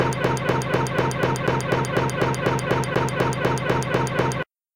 Damien koutodjo 2016 2017 car starter demareur de voiture
This sound was generated from the sound of the cigarette ignition,then it was cut, copied and connected,then it was amplified (+9.5 db),speed was accelerated (from 2.375), the result Can be akin to the noise of a car starter.
ignition, car, starter, automobile, start